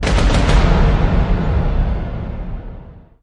Impact roll.....451 Downloads 0 Likes
come on guys you can do better 😑👍